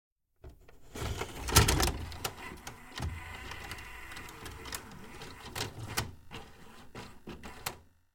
Sound of a videocassette loaded in a videorecorder.
Recorded with the Fostex FR2-LE and the Rode NTG-3.